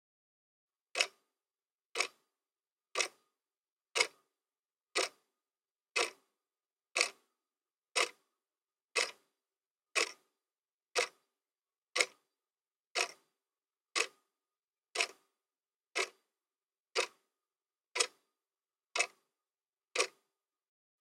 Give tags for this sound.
time wall-clock tick-tock timepiece tic-tac ticking hour clockwork clock

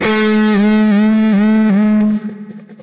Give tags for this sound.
heavy note thrash

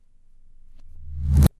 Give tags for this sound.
bang boom clang clash impact riser

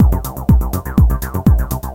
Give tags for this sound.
loop tb

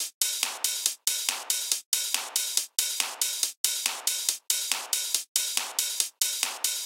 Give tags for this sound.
beats dance electronica loop processed

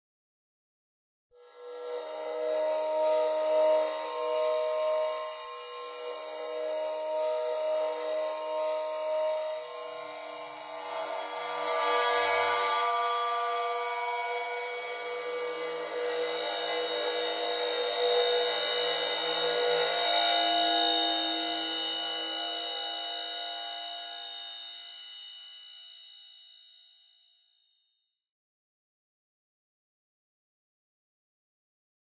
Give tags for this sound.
metal cymbal bowed

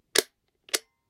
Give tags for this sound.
button click short switch

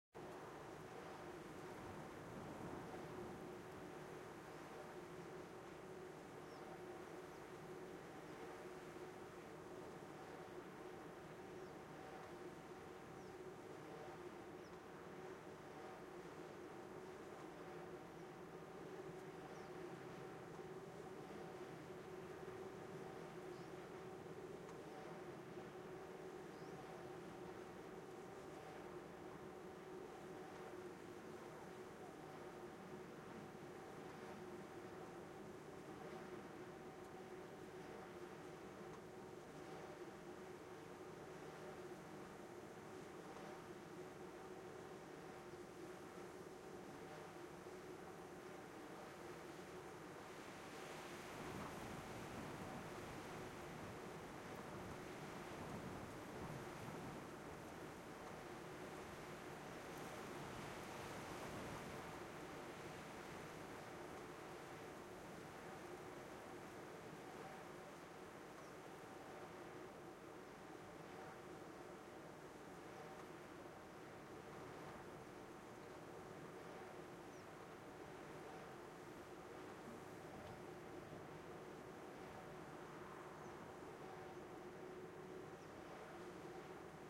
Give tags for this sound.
dutch ms stereo turbine wind

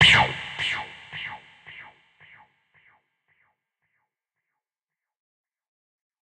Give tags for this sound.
industrial beat short processed drum-hit

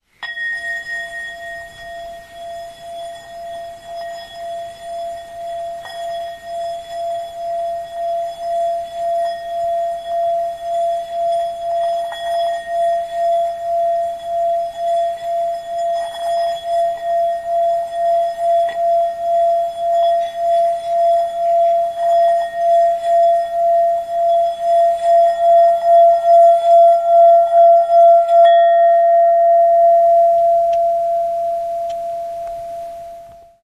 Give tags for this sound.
domestic-sounds field-recording instrument tibetan-bowl vibrate vibration

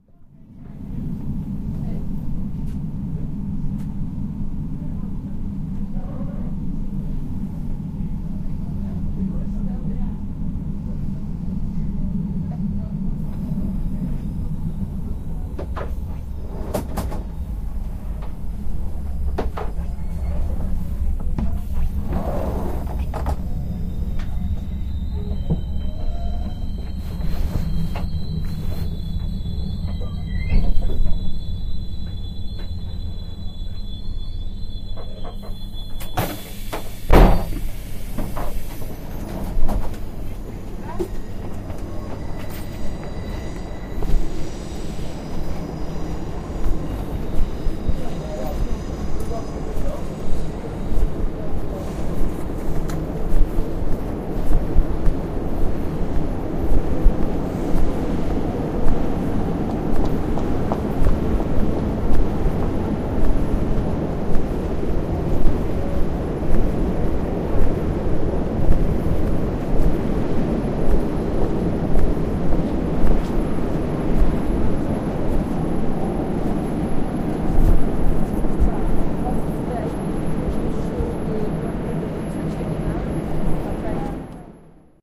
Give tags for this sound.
noise foot-steps field-recording street train

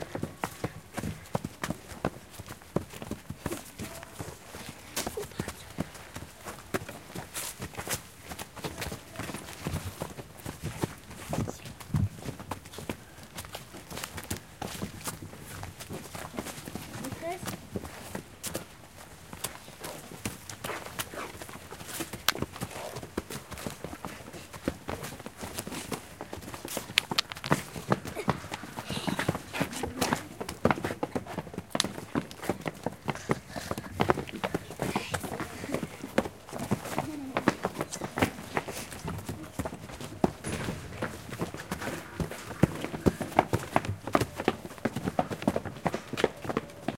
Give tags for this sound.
France recordings Paris school

